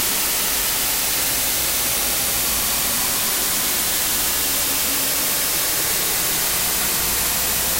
Channel Noise
Noise from TV